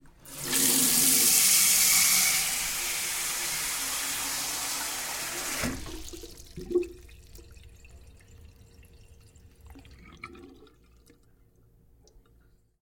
sink water bathroom4
Bathroom sink. Tiled walls and small. Faucet turns of, runs, turns off. You can hear the water draining.
Mic: Sennheiser MKH416